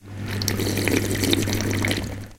SMC student drinking water from a fountain.